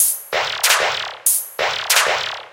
095bpm beatloop
Another drum loop that I made.